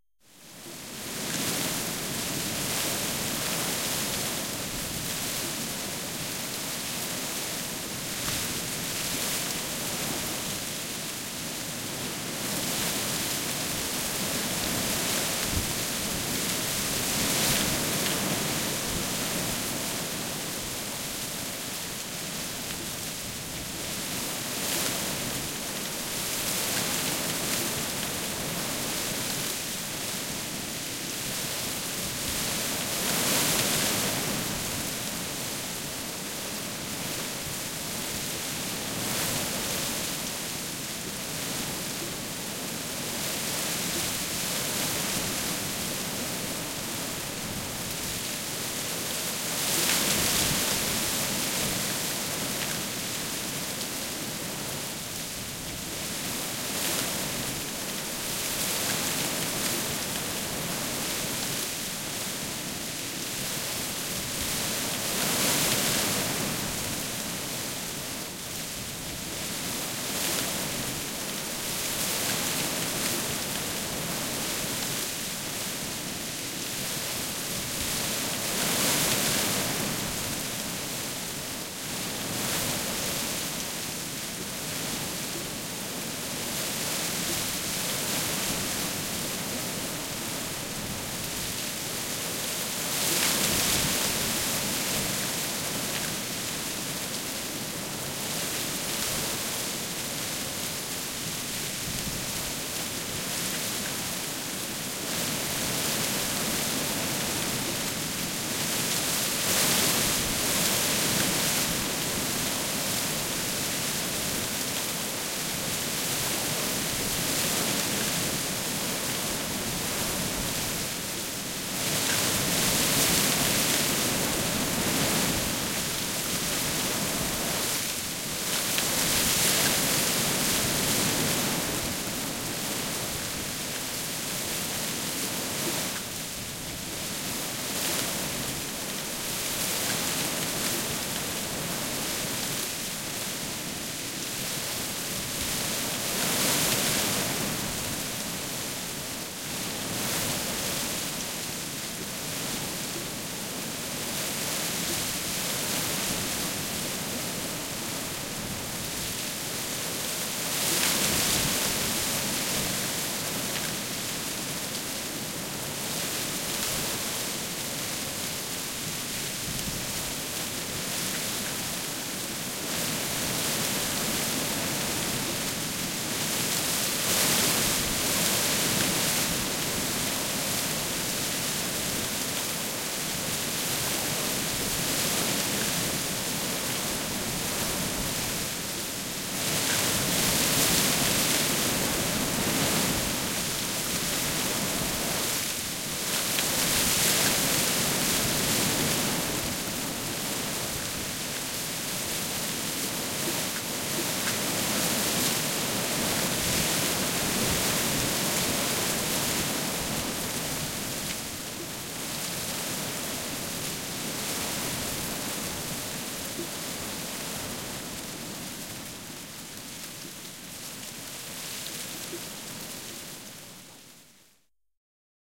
Tuuli puissa ja pensaissa, metsä / Light wind, breeze in the woods, leaves rustling in the trees and bushes
Hiljainen, kevyt, puuskittainen, suhiseva tuuli metsässä, lehdet kahisevat.
Paikka/Place: Nigeria
Aika/Date: 14.02.1989